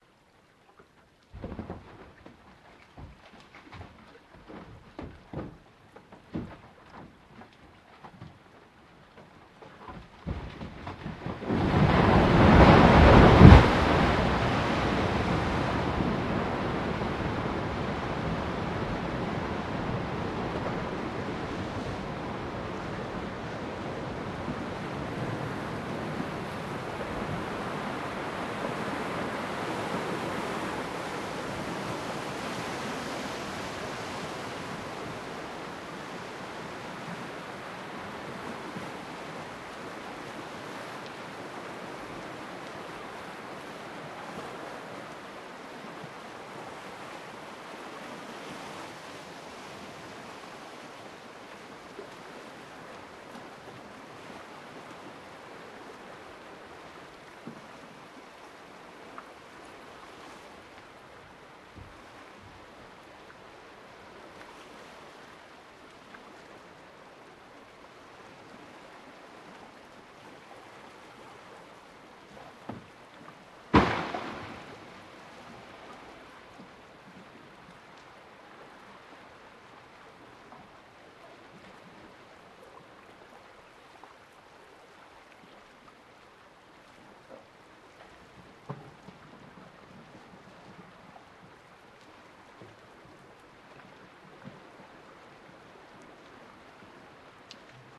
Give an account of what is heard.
glacier rubbing against itself until it splits apart with a roar
This is in Glacier Bay, Alaska